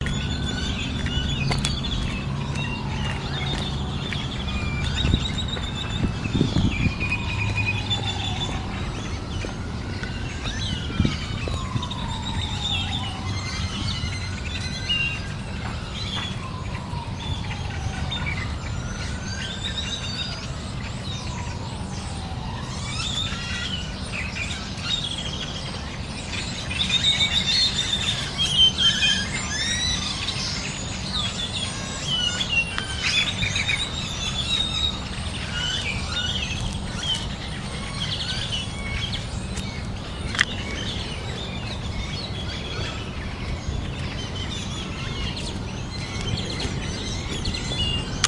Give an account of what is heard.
Background/distant sounds of traffic, faint voices. (Some of the birds may be European Starlings plus others, but I am not sure.)
traffic, sirens, field-recording, ambience, birds, city